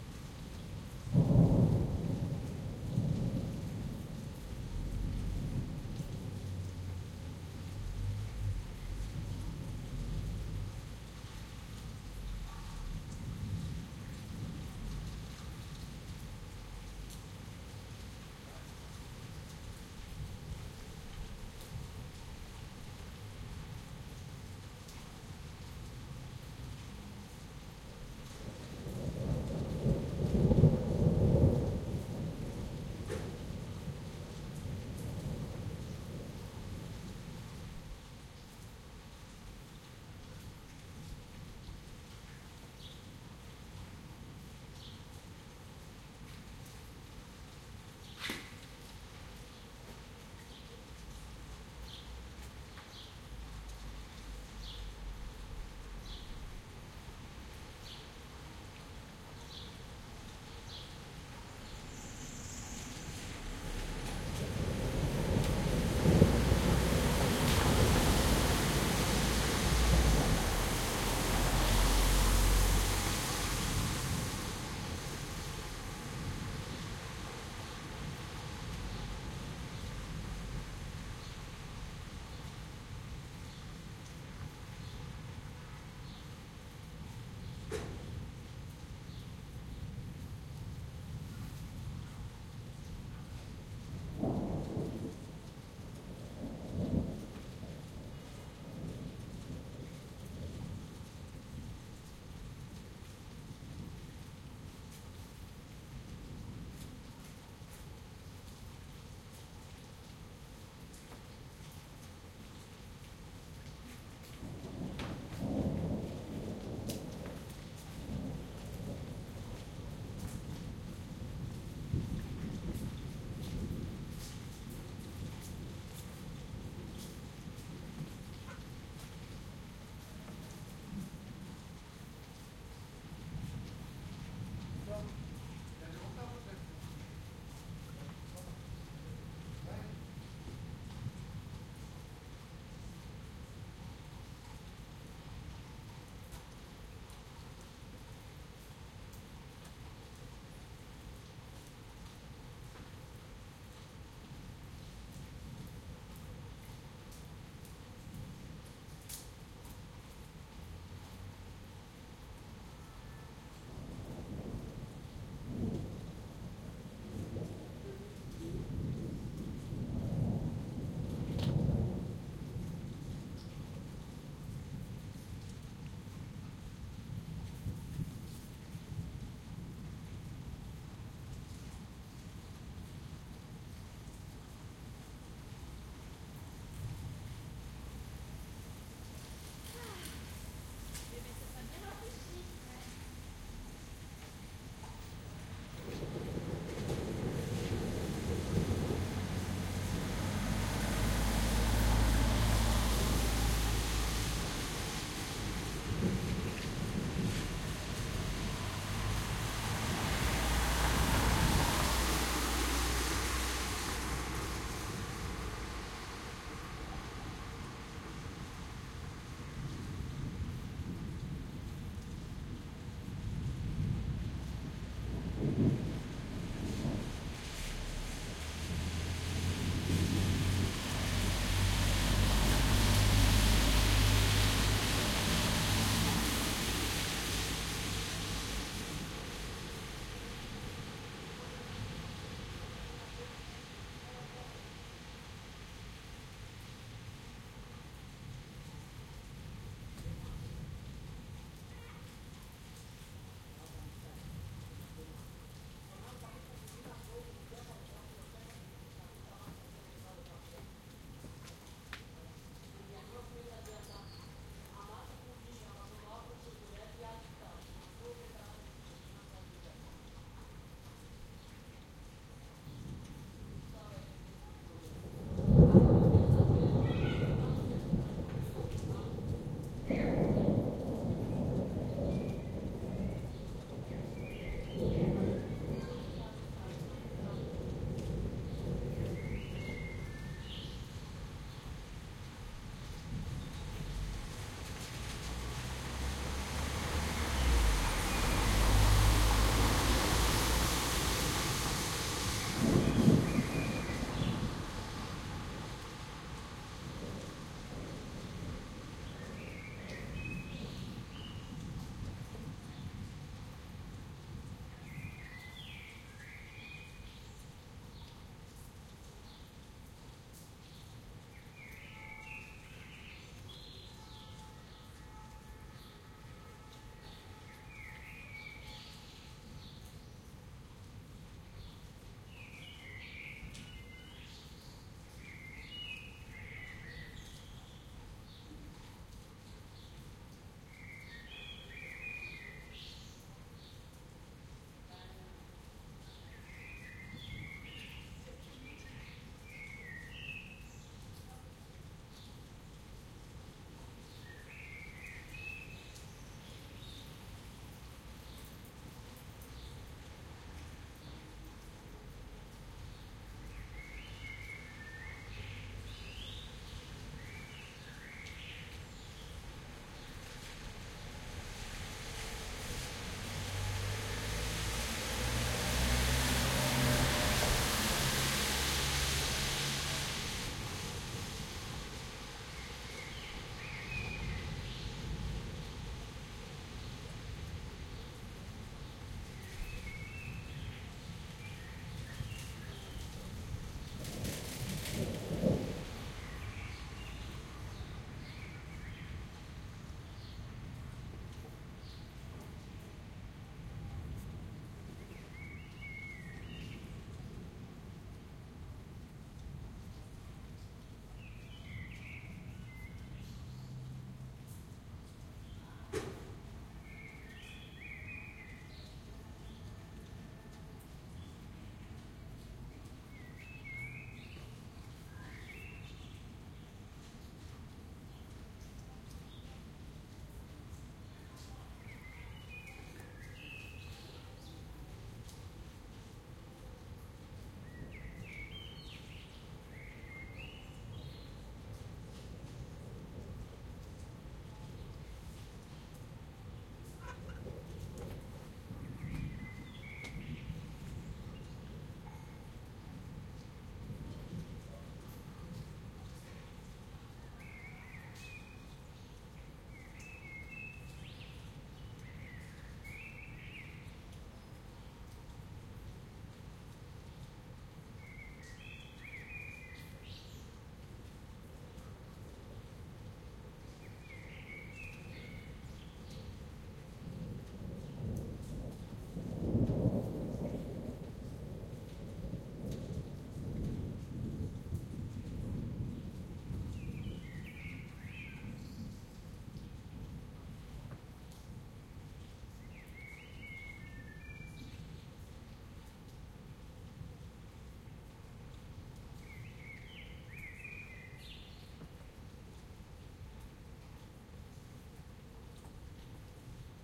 Stereo Street Soundscape + Rain After Storm
Recorded from my apartment, second floor. This is what followed the thunderstorm. A bit of rain, some typical street sounds...
bikes, ambience, rainstorm, nature, thunder, rain, field-recording, lightning, thunder-storm, city, rumble, weather, town